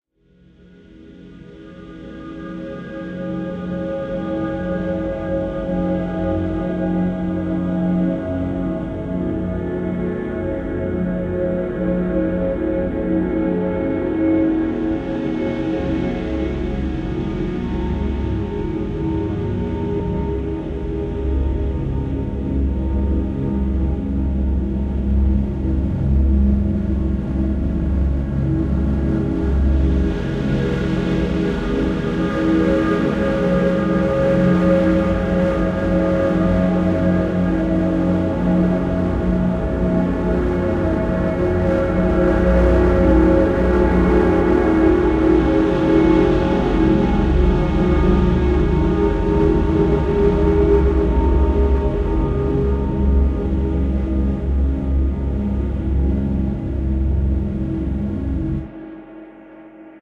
Synth Loop 11 2 - (Time-Stretched)
Synth Loop 11